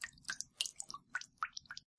Multiple Drips 005
aqua, bloop, blop, Dripping, Game, Movie, pour, pouring, River, Slap, Splash, wave